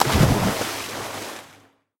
SPLASH (by blaukreuz)
Clean water splash sound.
lapping, liquid, splashing, wave, shore, sea, water, splash